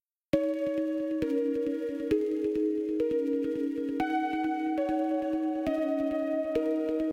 Citron-Short
Synthetic electronic melody